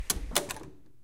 A door opening in wet weather
door, door-open, wet-door